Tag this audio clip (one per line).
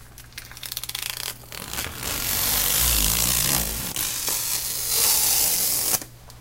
noise
remove
seal
weird
keyboard
sticker
tape
unbox
factory
peal